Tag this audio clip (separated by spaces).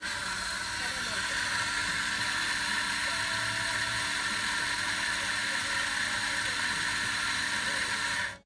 WINDOW INSTITUTE